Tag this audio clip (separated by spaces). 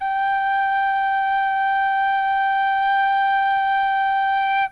G acoustic instrument monophonic short single-note trimmed whistle